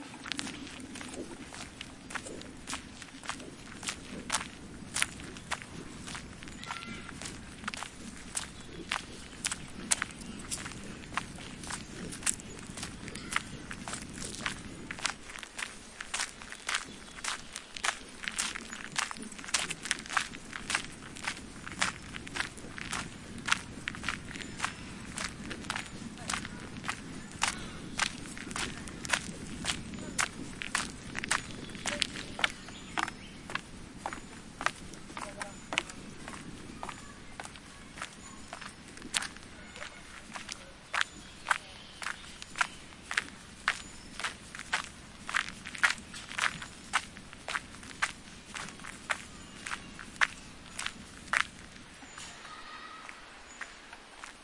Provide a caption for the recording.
Footsteps in countryside
Me walking in the countryside, passing over a small wooden bridge.
footsteps, bridge, countryside, wood